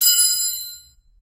Dropped Spanner 3
Ooops, dropped a small spanner on the concrete floor of my garage.
harmonics, metal, spanner